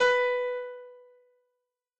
120 Concerta piano 03
layer of piano
concert, free, layer, loop, piano, string